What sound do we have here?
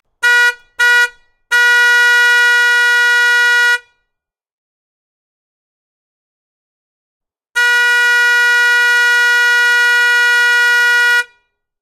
school bus truck horn honk 50m away
bus, honk, horn, school, truck